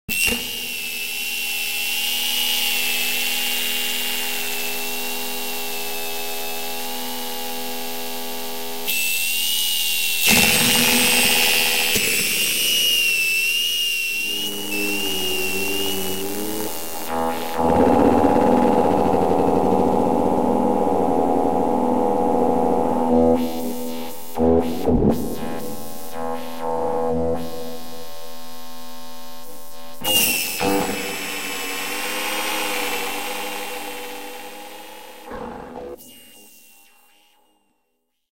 A painful visit to the virtual dentist complete with sound of the dentist's drill and some groaning. Part of my Hazardous Material sample pack - a dumping ground for the odd and often horrible.
drill, electronic, pain, processed, environmental-sounds-research, electro, dark, dentist, synth, noise